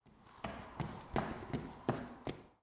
Recording of me walking to create footstep sounds for my sound design project